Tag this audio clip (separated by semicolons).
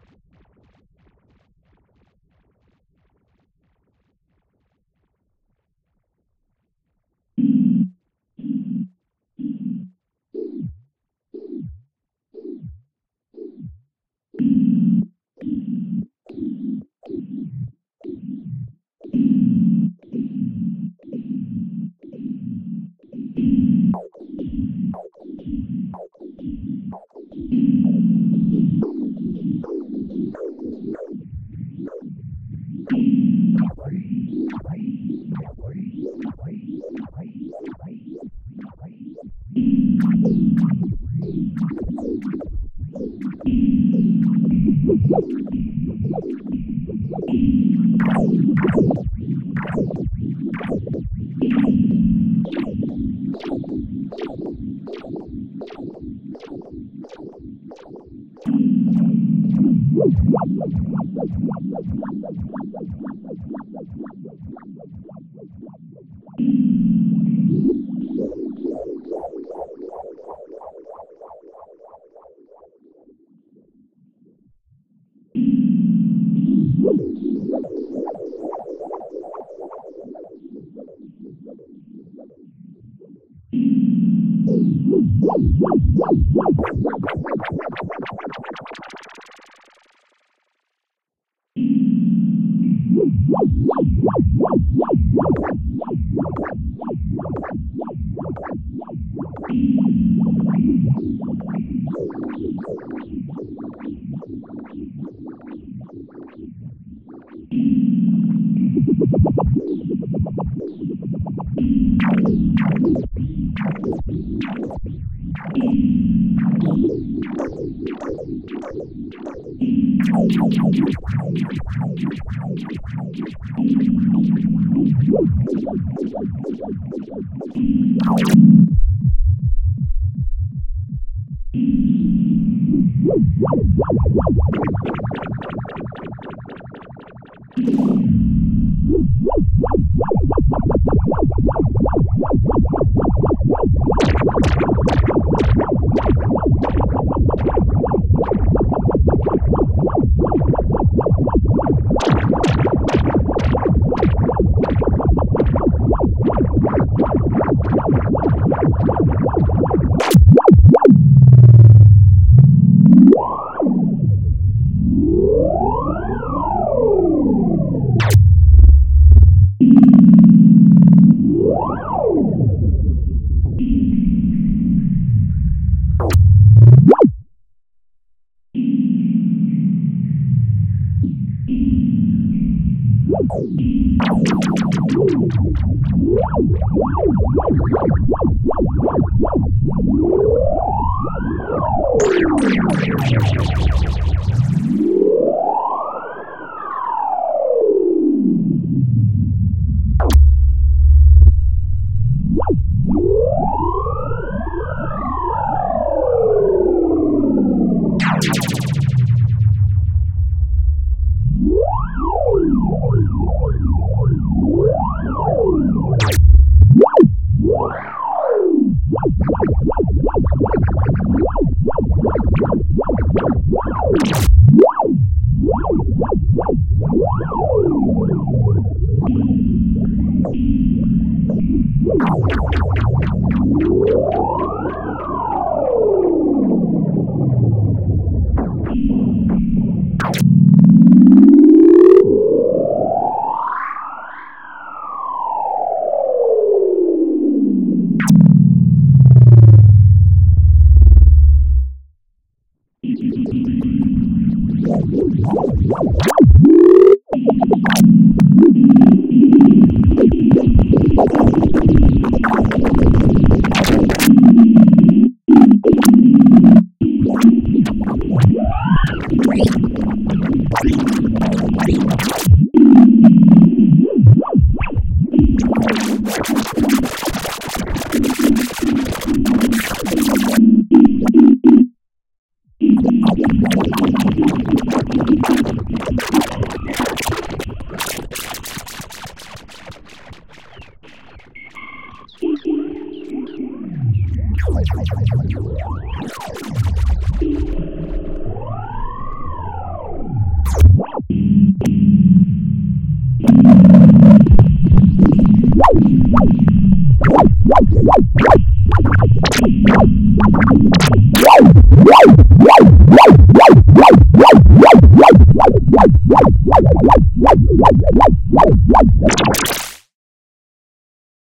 Delay; Experimental; LFO; Synth